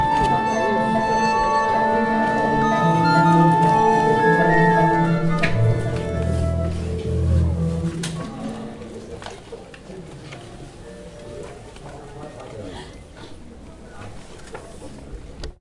minutes before starting concert by Macau Chinesse Orchestra
mandarin-house, Macau, instruments, chinese, tunning, orchestra